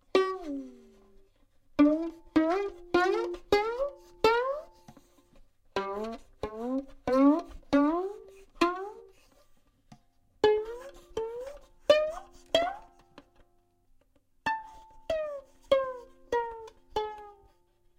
succession of glissandos made on violin strings. recorded with Rode NT4 mic->Fel preamplifier->IRiver IHP120 (line-in) / glissandos en cuerdas de violin